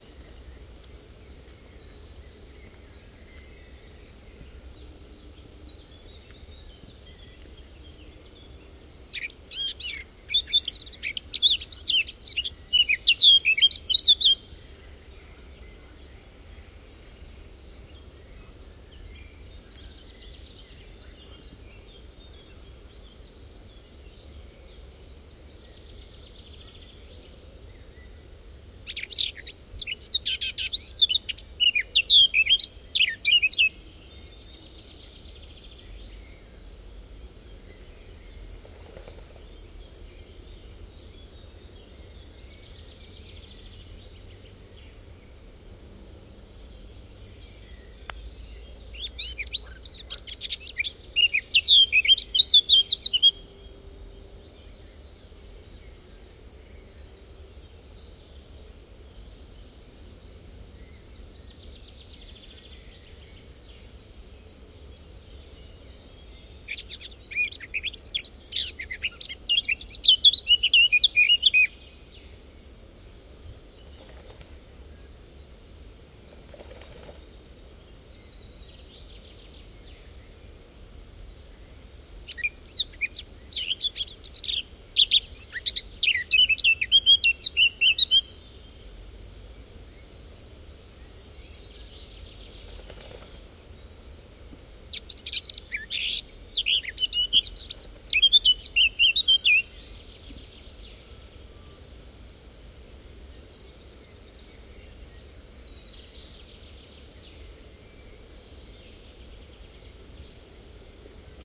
2012 5 24 black cap warbler
Song of a black cap warbler singing in spring time at the edge of a swamp ares near Dusseldorf, Germany. Vivanco EM35 over preamp into Marantz PMD 671.